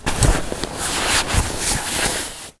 duffle with laundry
some familiar household laundry sounds. mono recording. concrete/basement room. stuffing laundry/jeans into a coated canvas duffel bag.